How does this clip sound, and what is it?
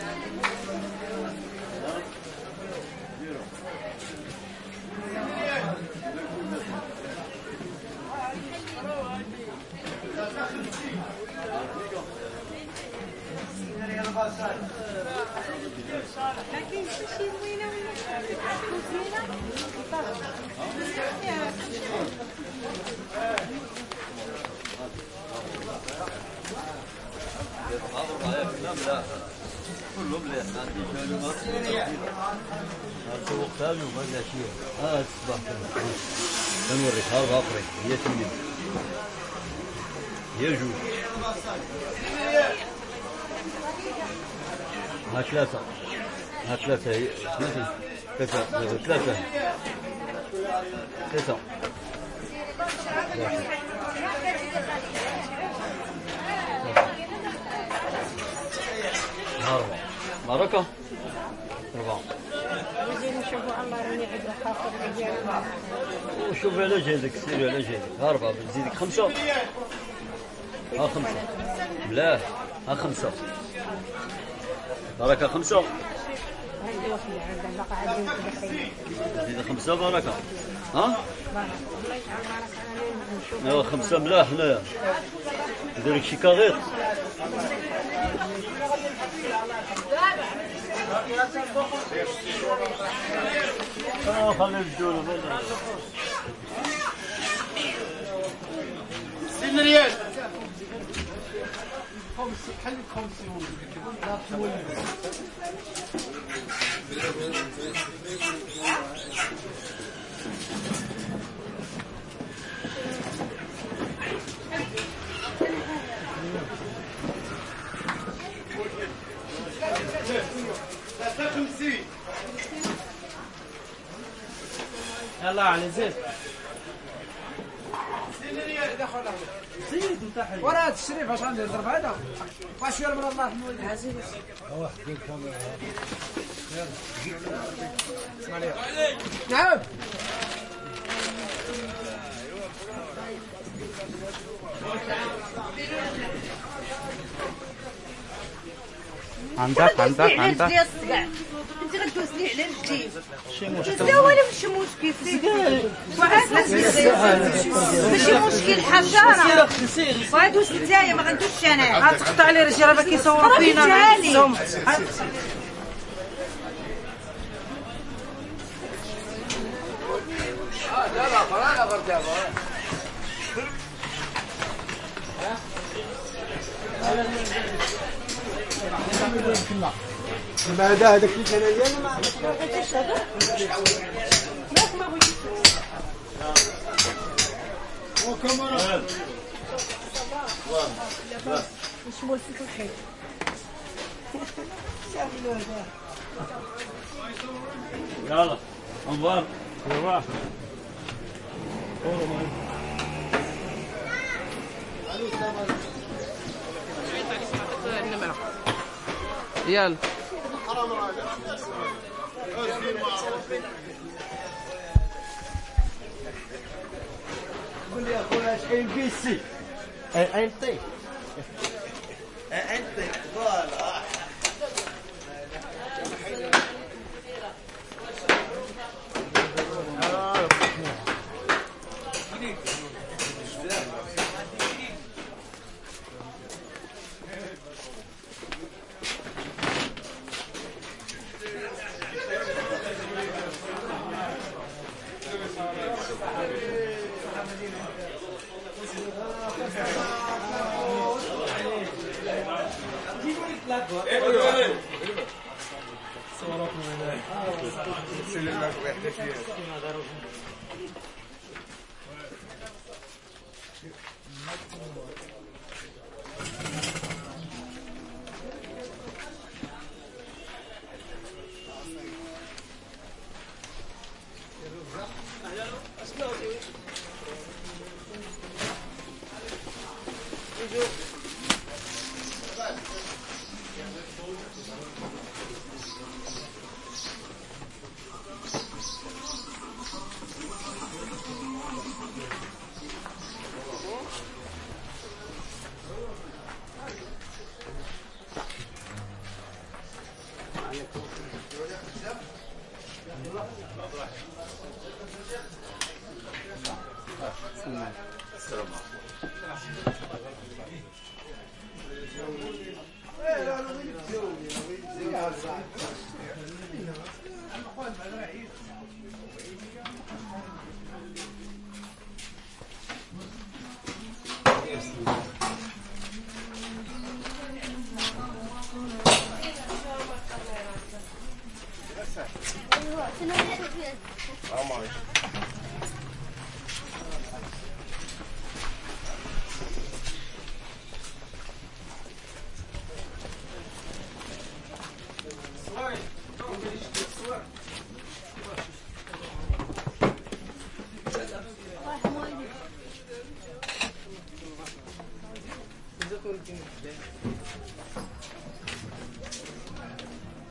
market ext covered meat butchers voices arabic birds plastic bags +construction banging middle Old Medina, Casablanca, Morocco MS
arabic, birds, butchers, Casablanca, covered, ext, market, meat, Medina, Morocco, Old, voices